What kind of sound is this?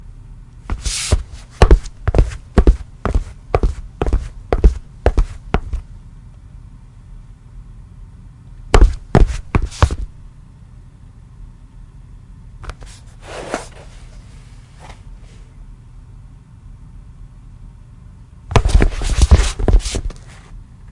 walking footsteps tennis shoes tile floor 10
A man walking in tennis shoes on tile floor. Made with my hands inside shoes in my basement.